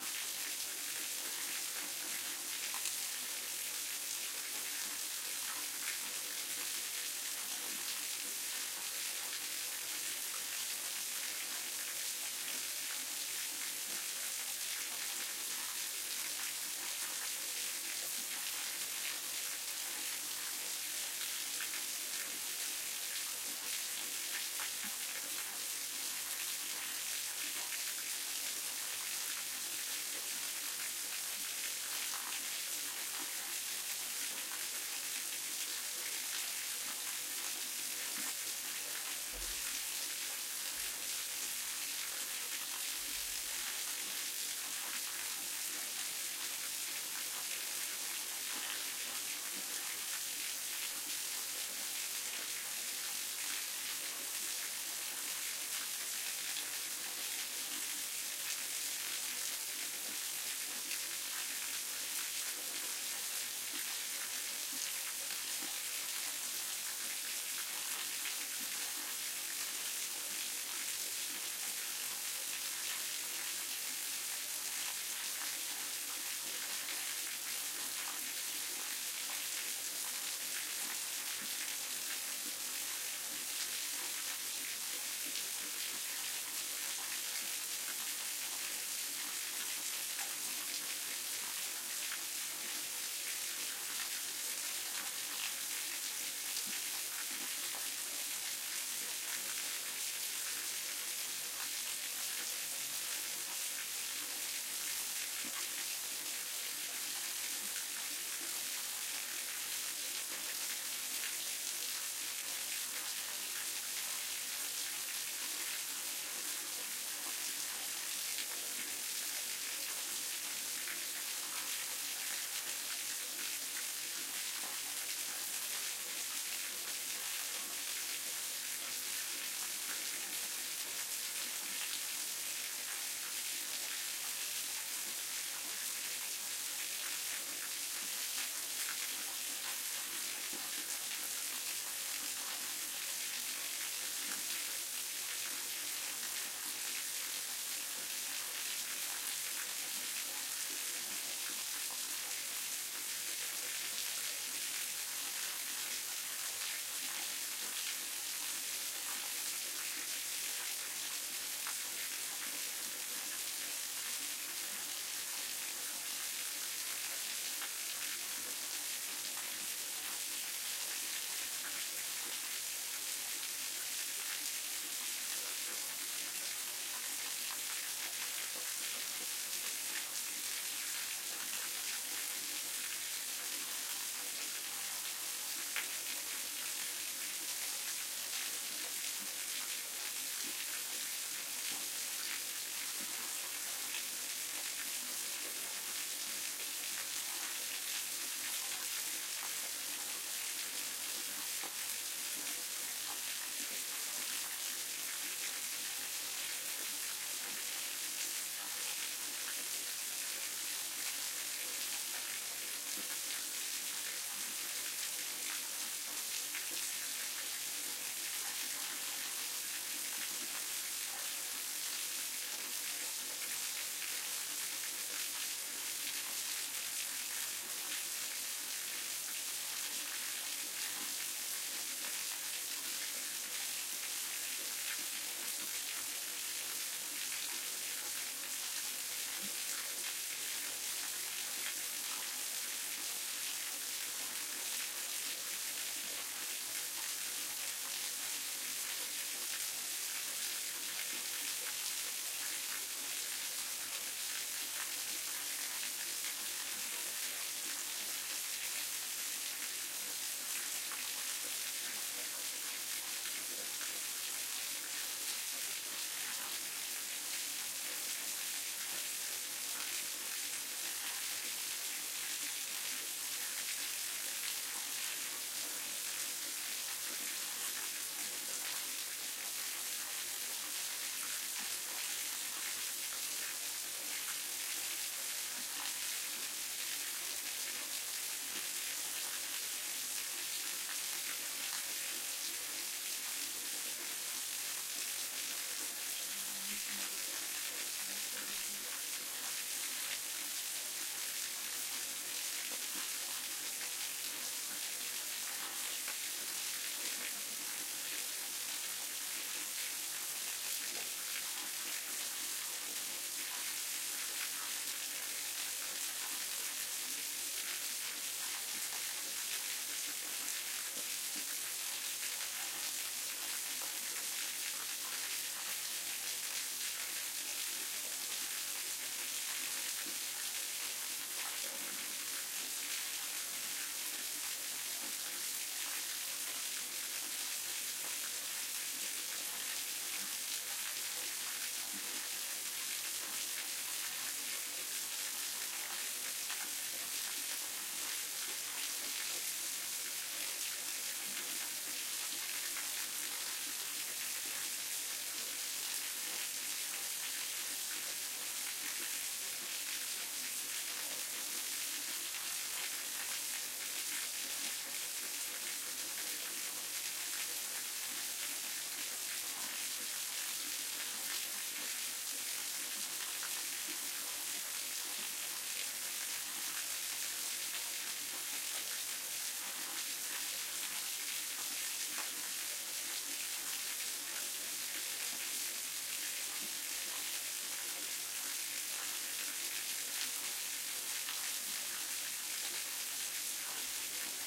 Recording of the flow of water in a waterfall.
Lastly, if you appreciate my work and want to support me, you can do it here:
Buy Me A Coffee
ambiance, ambience, ambient, bird, birds, birdsong, brook, creek, europe, field-recording, flow, flowing, forest, melt-water, mountain, nature, relaxing, river, sardinia, spring, stream, trickle, water, waterfall, wild, woods